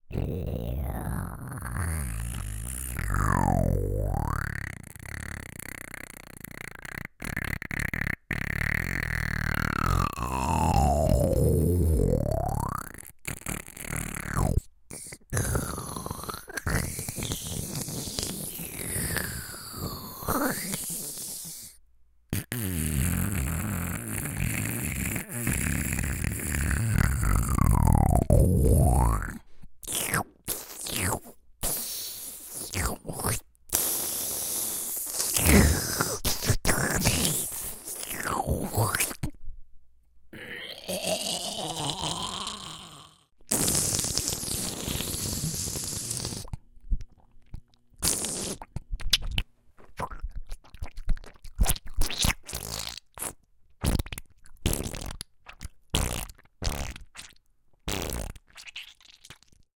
Vocal squish noises
effects, gurgle, human, Mouth, vocal, weird, wet